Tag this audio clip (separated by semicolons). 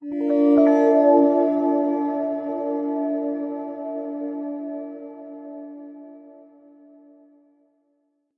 android; arcade; audacity; consola; gameaudio; gamedev; games; gamesound; indiedb; indiedev; indiesfx; soundeffects; stars; video; windows